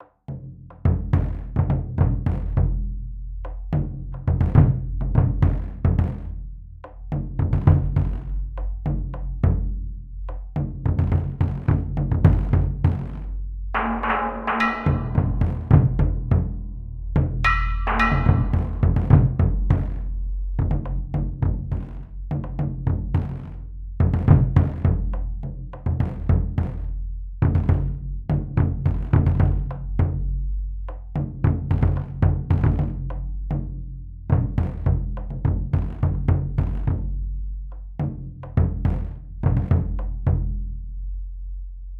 War Drums 2
This is my improv at the keyboard sped up to 210 bpm and processed through the Vita virtual synthesizer as "Soundtrack Percussion".
210-bpm, drum, drums, ethnic, improvised, percussion, percussive, rhythm, synth, synthesizer, war